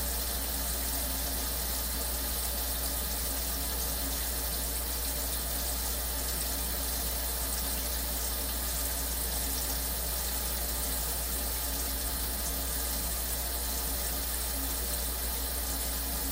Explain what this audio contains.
Under the sink with the cabinet door closed while running water and garbage disposal, can you guess which one is which?
sink, under